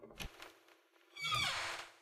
Squeaky Door Open
The sound of the doorknob turning can be heard shortly before the squeak of the opening door. Recorded on iPhone 6S and cleaned up in Adobe Audition.
turn, creak, latch, open, creaky, turning, opening, squeak, door, doorknob, squeaky